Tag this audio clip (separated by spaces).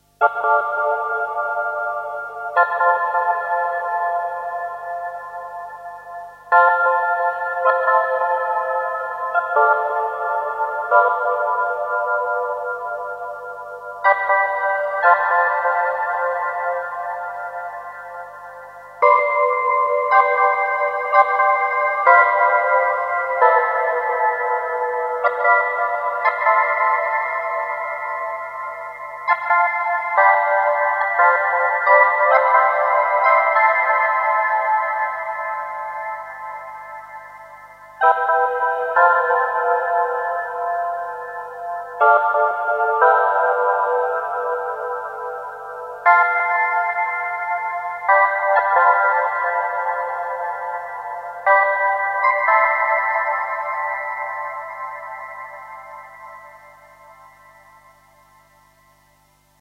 IDM lo-fi warp-label